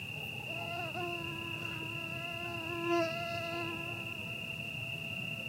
when you hear this... somebody wants your blood. The buzz of a mosquito, close up. Crickets in background / el sonido de un mosquito que se acerca buscando sangre